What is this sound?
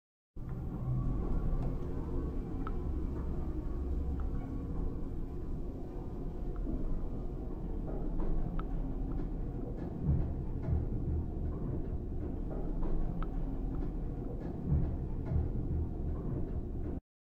Lift noise 1
Some lift noises I gathered whilst doing foley for a project